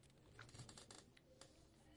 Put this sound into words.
Creaking sound 1
background, wood